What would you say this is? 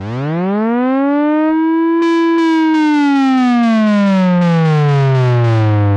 ARP Odyssey glide
ARP Odyssey portamento effect, a single key glide up with a multi-key slide back down.
analog, synthesizer, portamento, Odyssey, synth, glide, ARP